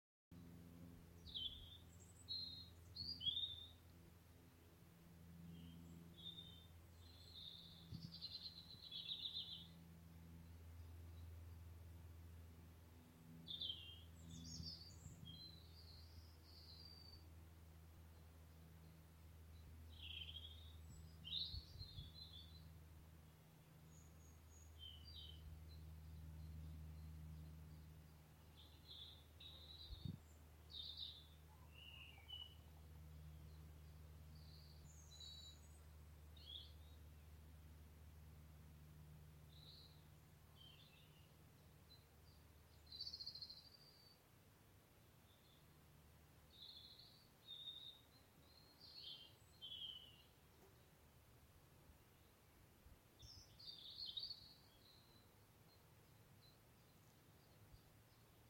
Woodland Birds
Another Robin chattering to himself.
ambience, spring, nature, woodland, bird-song, birds, field-recording, birdsong, bird, forest